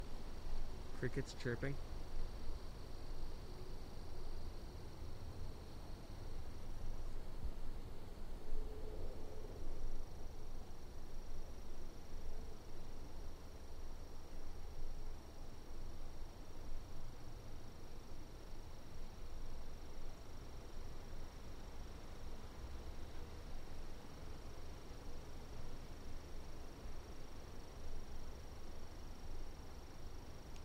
Crickets Chirping
field-recording, mono